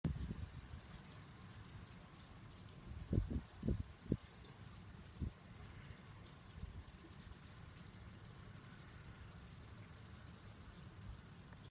Heavy Rain
Some rain outside my house I recorded
Bad-Weather, Nature, Rain, Storm, Thunder, Thunderstorm, Weather, Wind